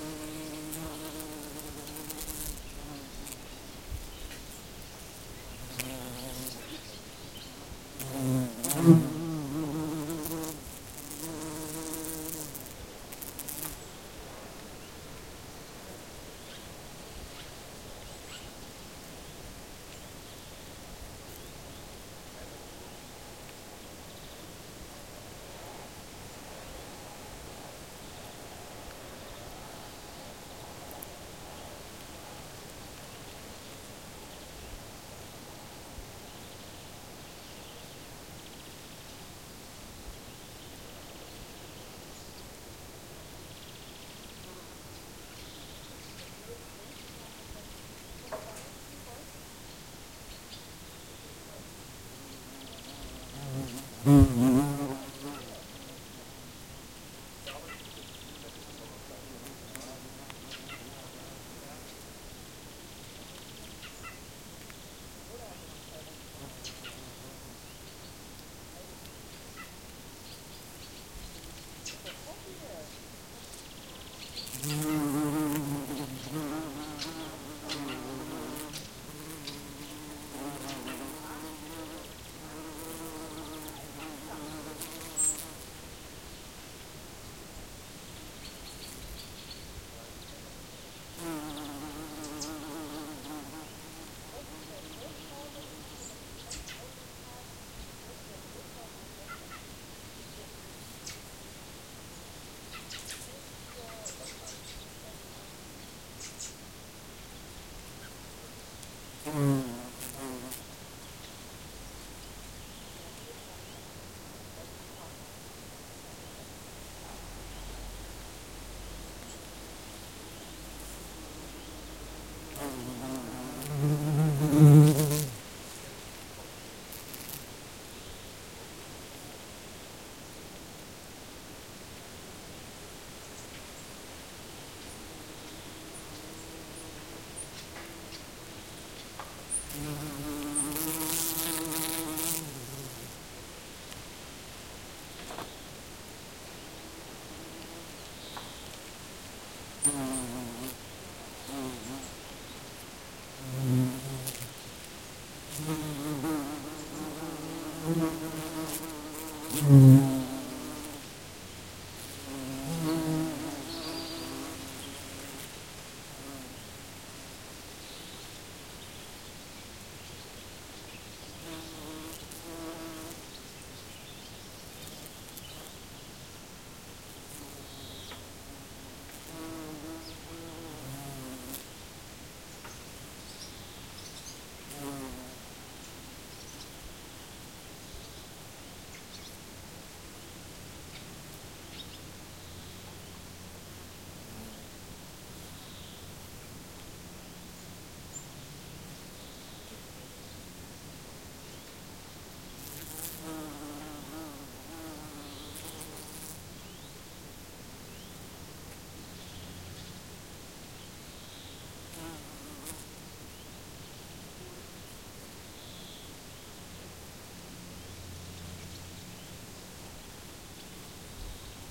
Bees and bumblebees are buzzing arround microphon
bumblebee, nature, people, bees, garden, insects, field-recording, buzzing, birds, summer